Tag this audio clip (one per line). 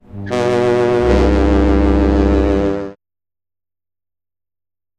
transportation
shore
horn
fog
warning
foley